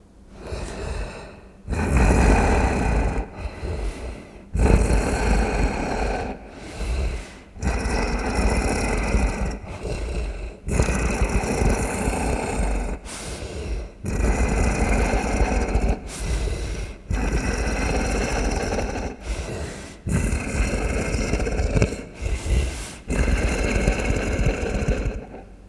Creatue Pant (Slow)
The slow, steady pant of a monster patiently stalking its prey.
beast, beasts, creature, creatures, growl, growls, horror, monster, pant, scary